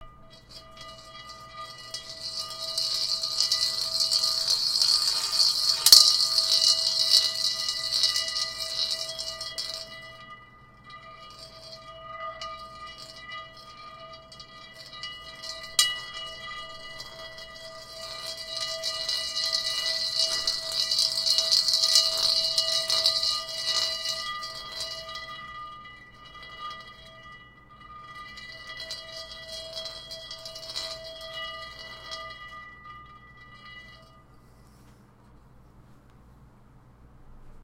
A glass marble spun in a wok cooking pot.